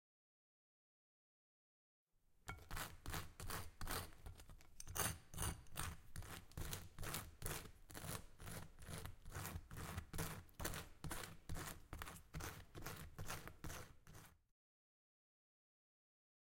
spreading the butter on bread 2
Sound of spreading butter on bread. You can use this sound for whatever scene of spreading something (marmelade, jam, nutella or buter) on bread. Recorded in kitchen on ZOOM H6.
Czech, Panska, PanskaCZ